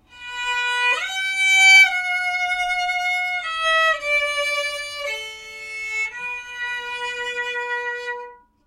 The classic sad violin piece, performed by my girlfriend, on her Czech violin.
"Hearts and Flowers" composed by Theodore Moses-Tobani and published in 1893.
Recorded with AT2020 USB directly to Audacity on my Macbook. Noise removed.